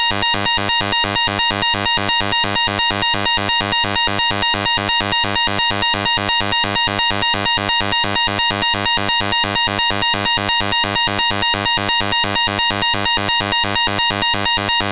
Cartoon-like siren recreated on a Roland System100 vintage modular synth
cartoon, sci-fi, siren, synthetic